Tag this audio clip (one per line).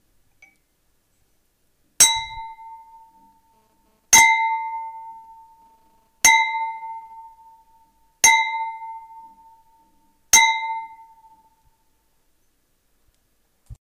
Glasses
recordning
klonking